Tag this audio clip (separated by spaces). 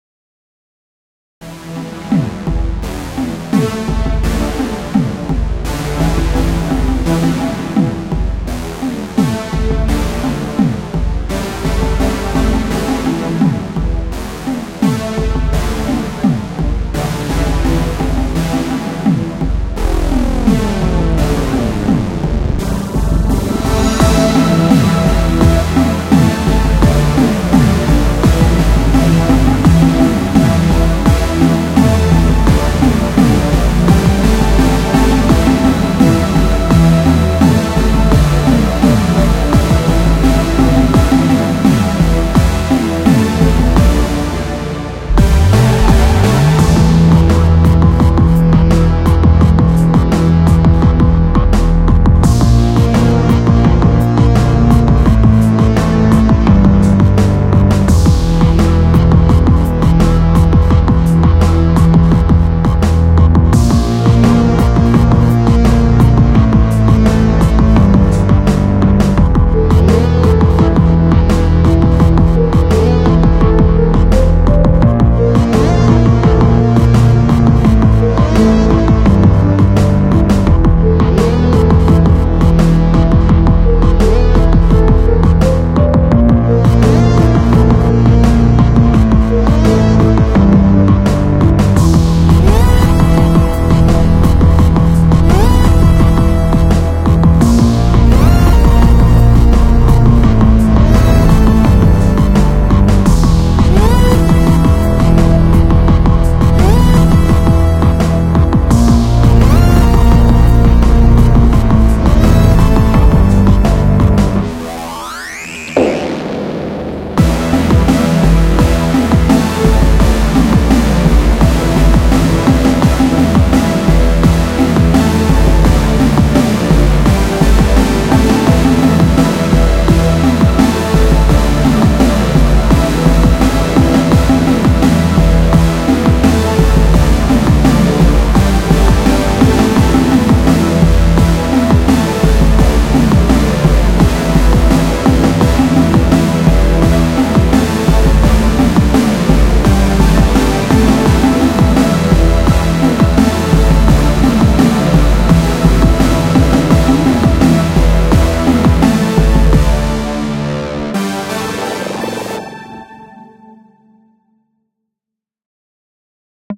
80s 90s action adventure electro electronic gamedev games gaming hotline-miami indiedev indiegame miamivice music ndnn NeitherDaynorNight platformer retro retrowave song soundtrack synth Synthwave techno video-game